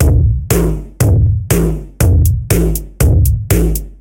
dust club 120bpm beat loop
I made these loop parts set in ableton live using non sample based midi instruments and heaps of effects.
120bpm, electronic, beat, dance, loop